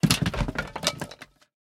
Recording of a variety of pieces of wood falling onto other pieces of wood. This one is a bit longer (moderate duration) and more complex than some of the others in this pack. Was originally recorded for smashing sound effects for a radio theater play. Cannot remember the mic used, perhaps SM-58, or a small diaphragm condenser; but it probably went through a Sytek pre into a Gadget Labs Wav824 interface.
clean, crash, debris, wood